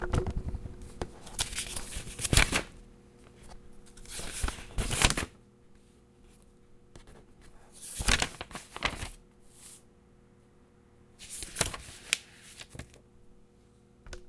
nothingo more than... turning pages of a book with thick pages.
book, pages, paper, turn